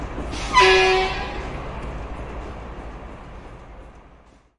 WaHi Airbrakes blast
Single blast of airbrakes on very large truck, NYC street.
brakes, Truck